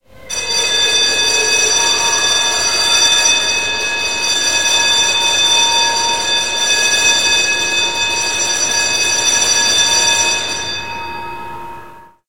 School Bell
our, town